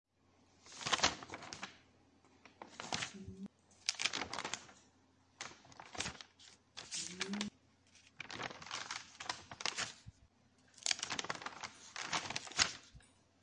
Sound of a sheet the paper